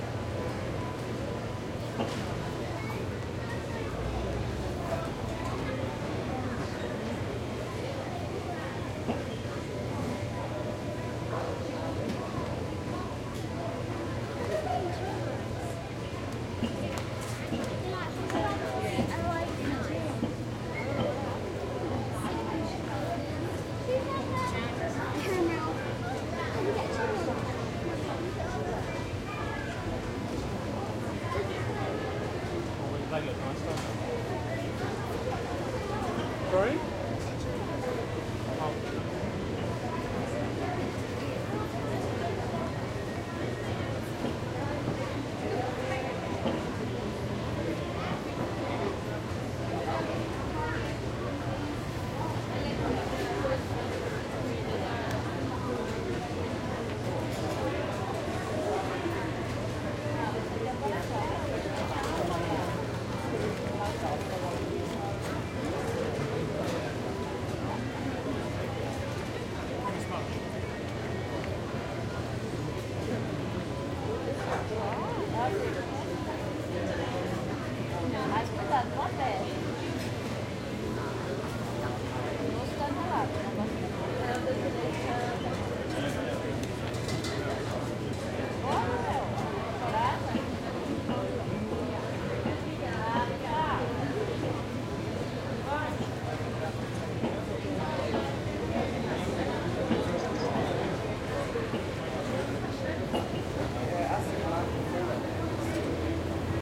140802 Greenwich FoodMarket R
4ch surround recording of a food market situated in a closed arcade area in Greenwich/England. A hubbub of voices can be heard, many people walking by, talking and purchasing or trying diverse food on offer.
Recording was conducted with a Zoom H2.
These are the REAR channels of a 4ch surround recording, mics set to 120° dispersion.